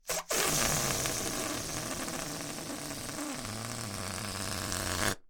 Recorded as part of a collection of sounds created by manipulating a balloon.